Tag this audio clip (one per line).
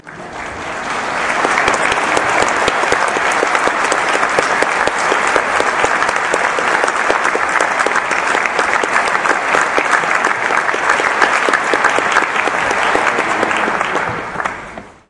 crowd
audience
group
applauding
clap
auditorium
applause
clapping
concert-hall
appreciation